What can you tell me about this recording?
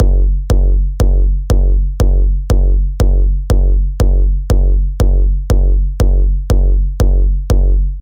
hard beat 4x4 techno
606, 808, beat, bounce, dance, dj, driving, drum, electronic, food, hard, hit, house, kick, loop, mash, pound, pump, synth, techno, thump, trance